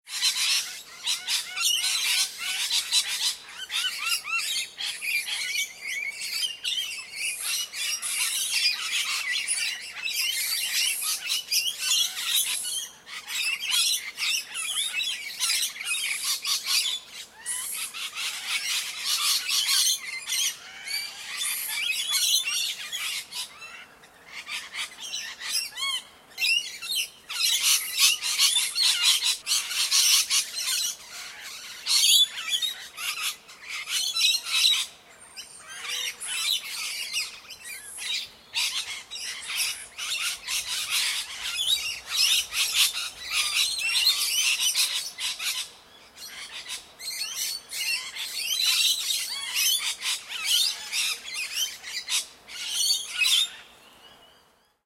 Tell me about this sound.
Parrots in tree
A flock of Australian parrots in a tree squawking.
birds, flock, parrots, squawking